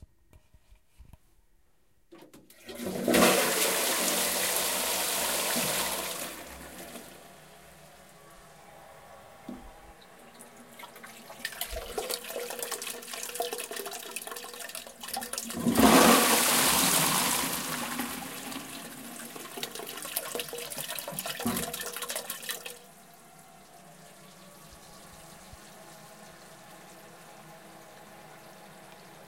flushing toilet
Flushing watercloset and refilling, recorded in the Netherlands with Zoom H2n.